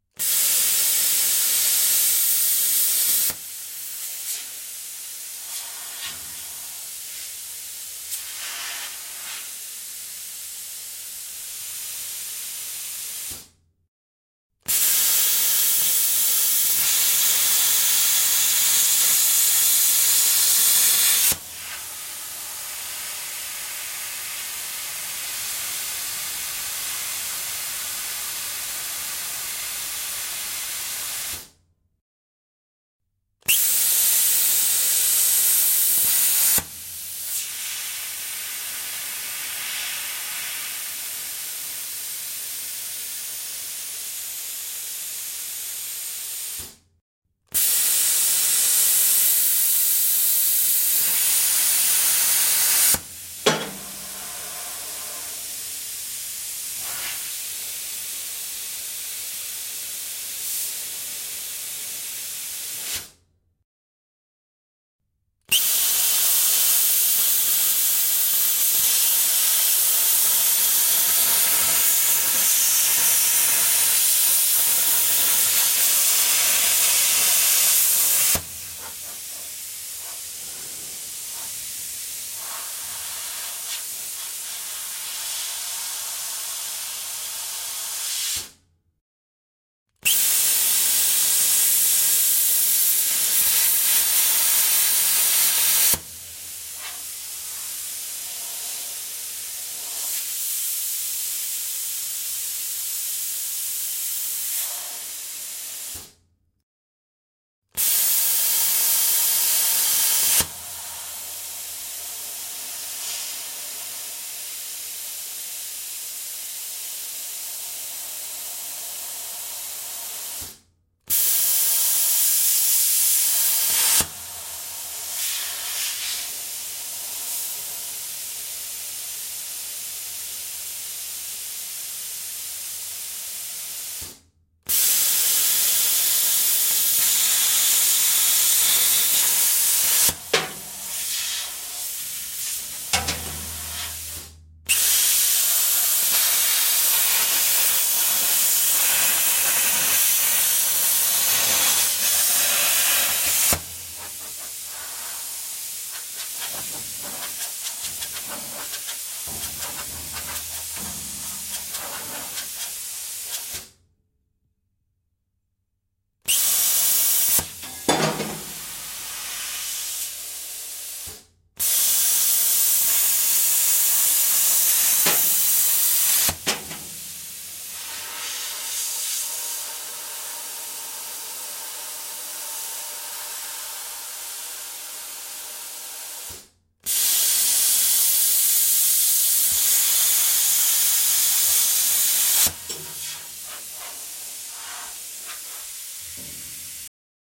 Using a plasma cutter to cut a metal plate in pieces.